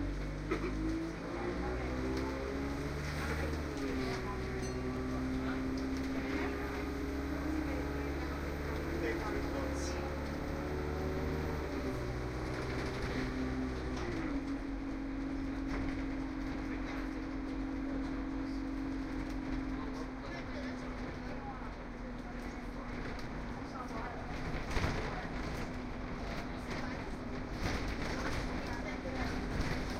30-sec binaural field recording, recorded in late 2012 in London, using Soundman OKM II microphone.
This recording comes from the 'scene classification' public development dataset.
Research citation: Dimitrios Giannoulis, Emmanouil Benetos, Dan Stowell, Mathias Rossignol, Mathieu Lagrange and Mark D. Plumbley, 'Detection and Classification of Acoustic Scenes and Events: An IEEE AASP Challenge', In: Proceedings of the Workshop on Applications of Signal Processing to Audio and Acoustics (WASPAA), October 20-23, 2013, New Paltz, NY, USA. 4 Pages.